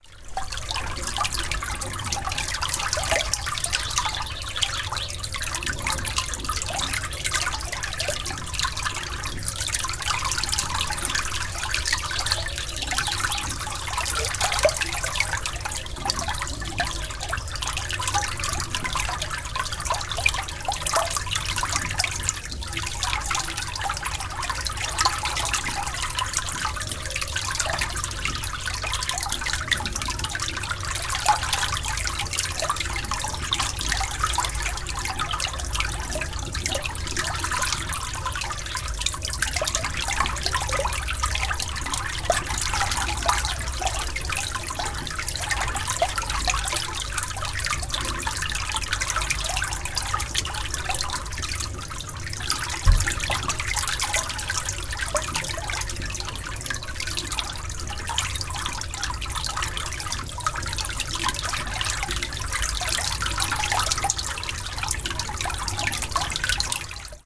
Water in drain
Water flows in a drain, Version 2 - recorded with Olympus LS-11
drain, water